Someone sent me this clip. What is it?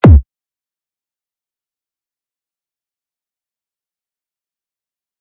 Kick sound made on Linux MultiMedia Studio (LMMS).